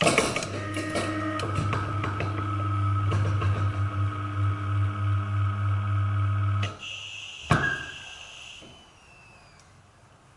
Outdoor passageway electronic door-opener
An electric dooropener goes off on a door after a passageway to enter a commercial transportation boat on a pier
door, door-opener, open